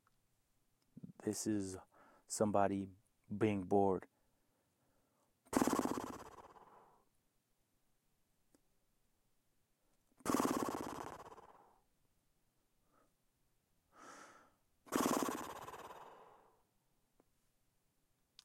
bored. guy blowing his lips as if he is bored
mouth, lips, bored